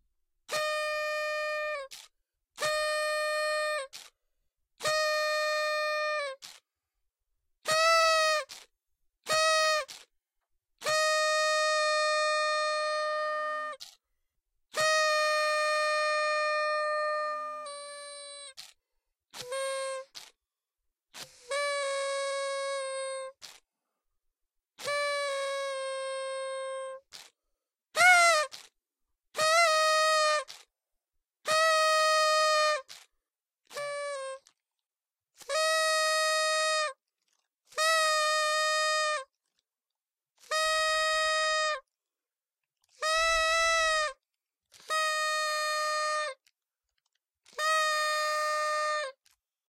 party horn 1/3 clean
horn, party, clean, festival